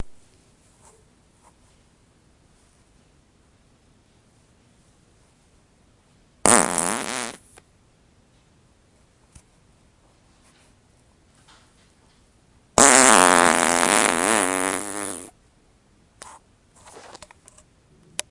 cats suck havesomegases
Raw unedited recording of a fart that has nothing to do with cats.